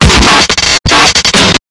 Pump 6 Extreme Glitch
Do you like Noisy Stuff ( No Para Espanol)
Breaks
deathcore, e, fuzzy, glitchbreak, h, k, l, love, o, pink, processed, t, y